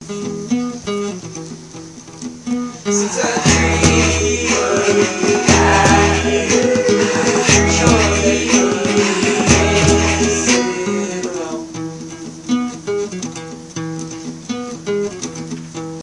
DREAM WORTH DIYING2 Mixdown
A collection of samples/loops intended for personal and commercial music production. All compositions where written and performed by Chris S. Bacon on Home Sick Recordings. Take things, shake things, make things.
voice, Indie-folk, acapella, drums, rock, looping, drum-beat, vocal-loops, Folk, original-music, indie, sounds, samples, piano, loops, acoustic-guitar, melody, whistle, loop, percussion, free, beat, guitar, harmony, synth